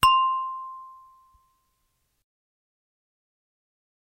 small elevator ding, no reverb
Recordists Peter Brucker / recorded 4/21/2019 / shotgun microphone / created by flicking a wine glass
ding, elevator, elevator-ding, short